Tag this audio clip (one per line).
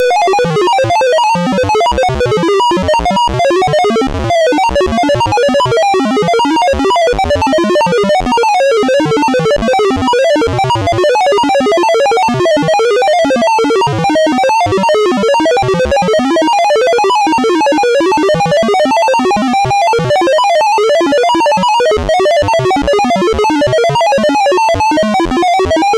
8bit
computer
pcspeaker
sound
random
beep